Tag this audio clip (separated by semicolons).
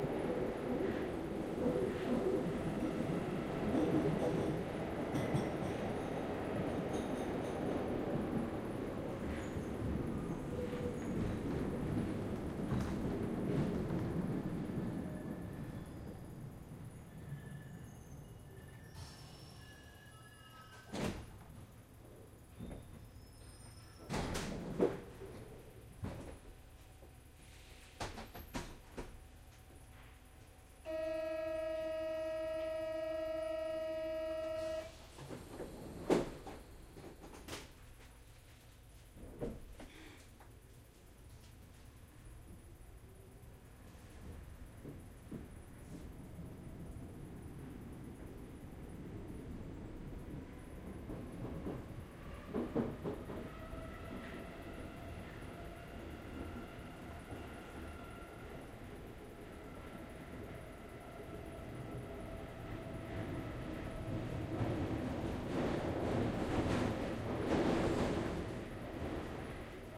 accellerating; interior; metro; metropolitain; paris; stopping; subway